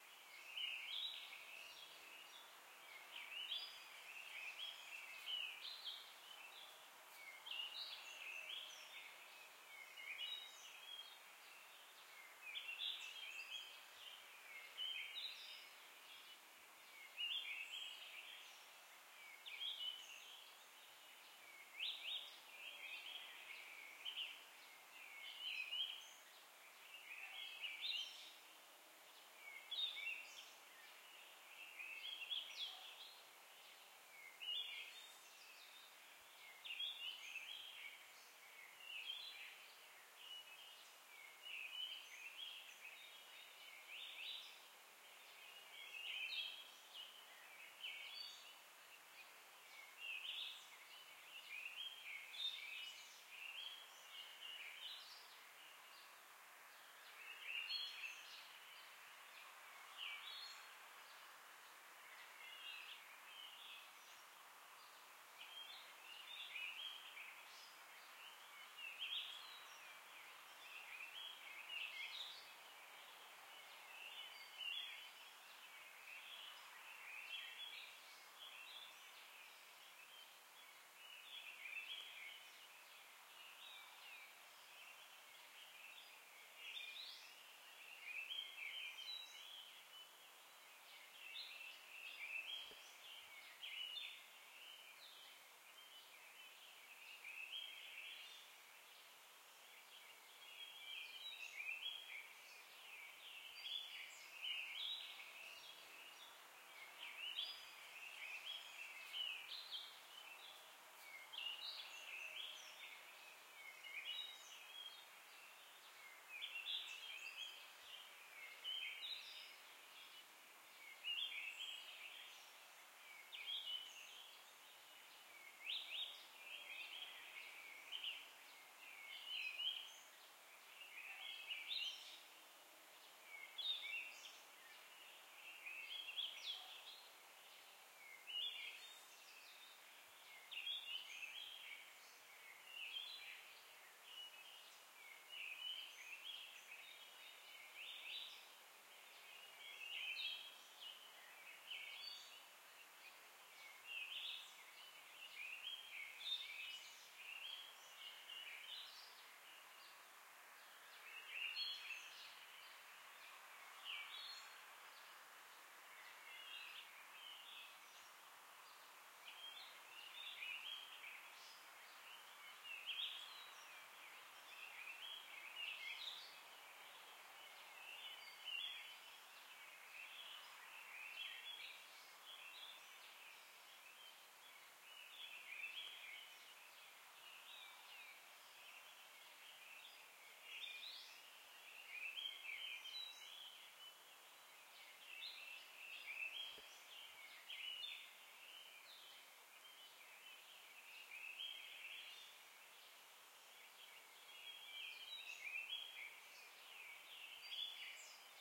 Early morning ambience: The sounds of early morning, comprised mostly of bird's chirping and some wind here and there, but very little. A loop ready ambience that is about 3:30 long.Recorded with a ZOOM H6 recorder and a XY capsule mic.
Post-processing was applied to the sound in the form of an equaliser to filter out some noise in the low tones and to boost the chirping sounds in the high tones in order to make it more vibrant. This sound was recorded in a backyard at around 4AM in the morning.
OWI, ambience, bird-ambience, bird-sounds, birds, birds-chirping, early-morning-ambience, morning-sounds